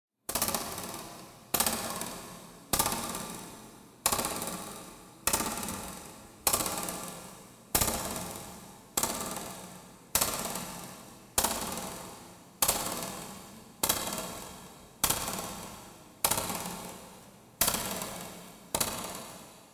Rules/Reglas [Hits] (G4)
Sonido que se genera por una regla de plástico cada hit es corto pero repetitivo
Sound generated by a ruler, each hit is short and repetitive
effect, hits